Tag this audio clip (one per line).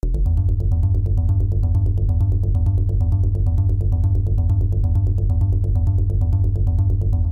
roll
corpus
dance
techno
bass